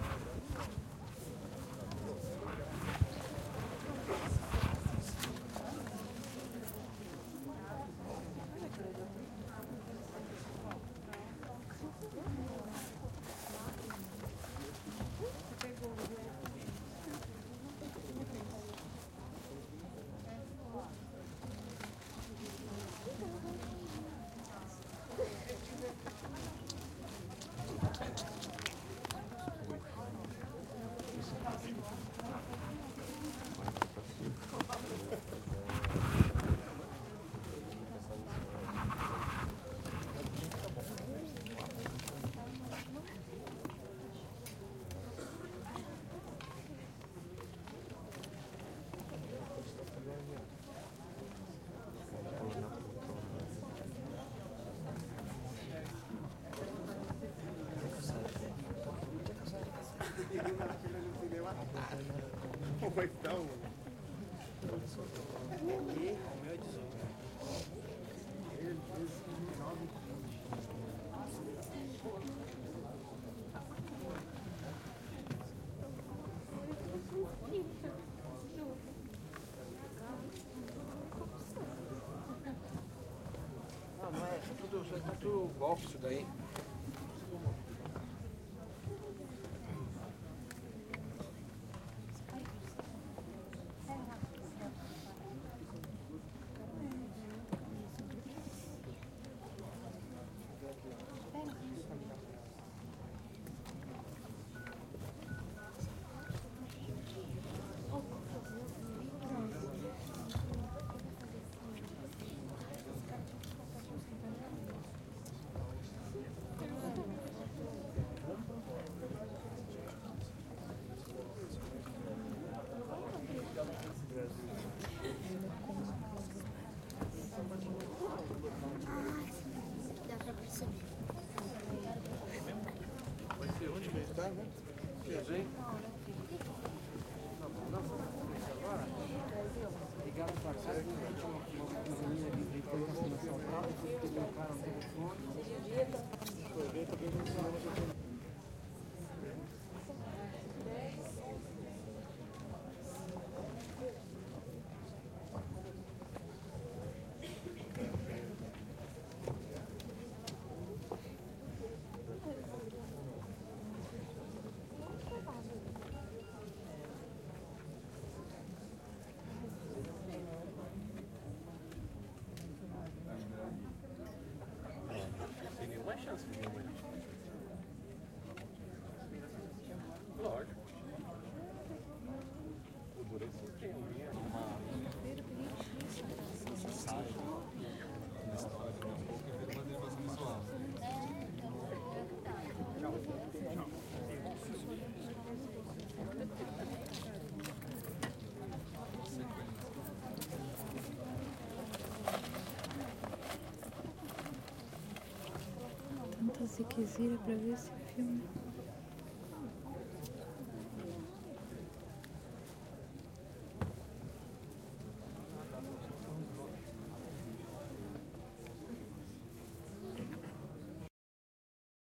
cinema antes do filme
Room, People, Public